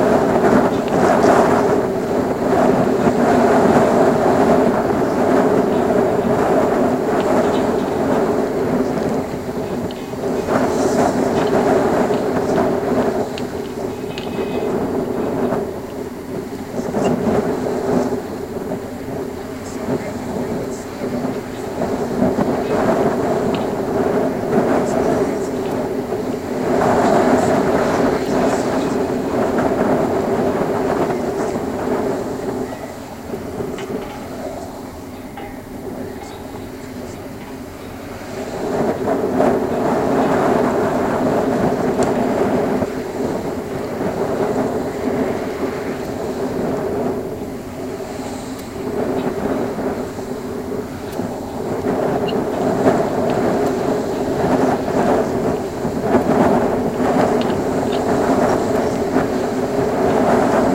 GGB A0214 main cable at SE63
Contact mic recording of the Golden Gate Bridge in San Francisco, CA, USA at the center of the span, main cable above suspender #63. Recorded October 18, 2009 using a Sony PCM-D50 recorder with Schertler DYN-E-SET wired mic.
bridge, cable, contact, contact-mic, contact-microphone, DYN-E-SET, Golden-Gate-Bridge, metal, microphone, Schertler, Sony-PCM-D50, steel, wikiGong